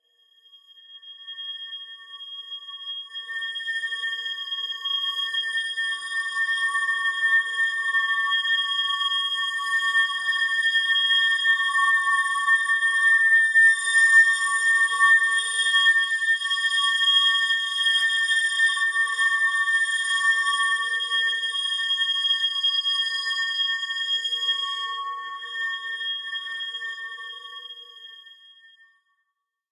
glass pad reverb
Trying to get a bowed glass pad! A glass of water tuned, if I remember right, to A, and bowed mercilessly with wet fingers.
Recorded by Sony Xperia C5305, heavely edited in Audition.
glass-pad, bowed-glass, dream, pad, glass, sweet, drone